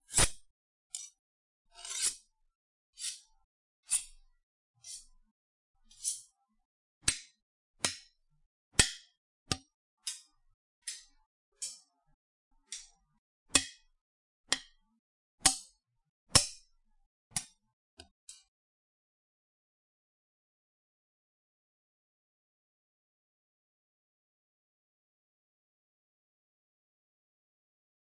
Knives scraped and tapped together
Two knifves being scraped and tapped against each other.
Microphone: Zoom H2
kitchen, tap, impact, knives, knife, metal, blade, scraping, scrape, strike, scrapes